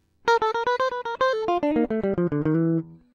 guitar melody 7
Improvised samples from home session..
licks, jazz, groovie, lines, funk, pattern, guitar, fusion, jazzy, acid, apstract